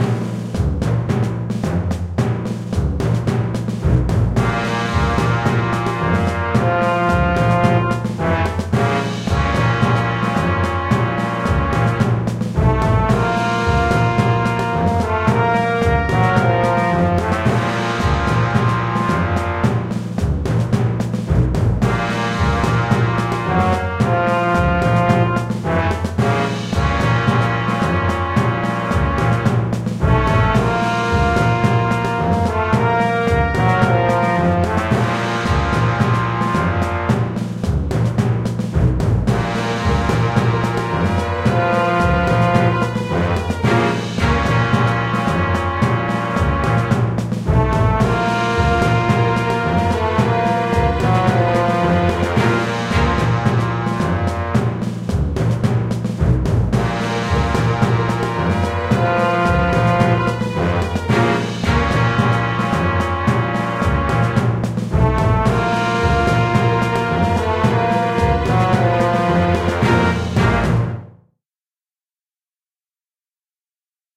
Battle Tactics
The enemy is invading our lands.
Diplomacy has failed and war seems inevitable.
Flee now, while you still can!
Although, I'm always interested in hearing new projects using this sample!
orchestra, drum, brass, march, battle, glissando, strings, emperor, music, military, short, war, trombone, trumpet, general, fanfare, entrance, army, intimidating, motif